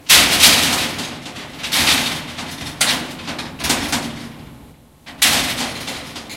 Scrap Metal Rummaging
Bang, Boom, Crash, Friction, Hit, Impact, Metal, Plastic, Smash, Steel, Tool, Tools